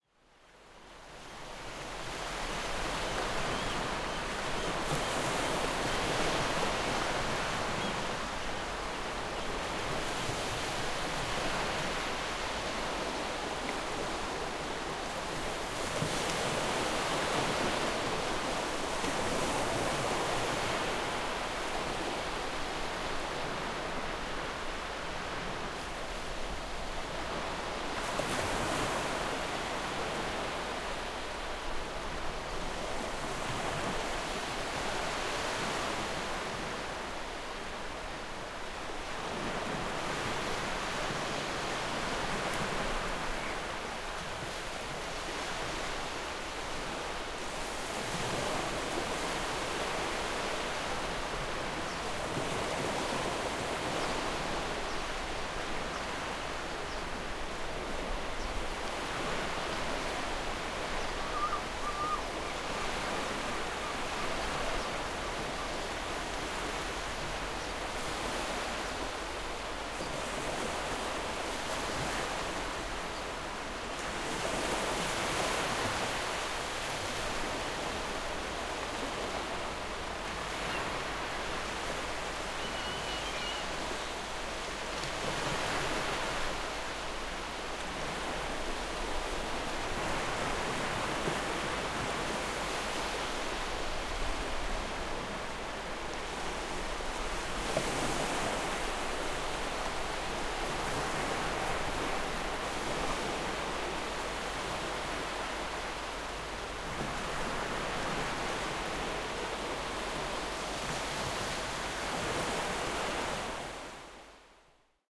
Calm ocean waves crashing on a beach with little birdsong and distant gull sounds. Recorded in Walton-on-the-Naze, Essex, UK. Recorded with a Zoom H6 MSH-6 stereo mic on a calm spring morning.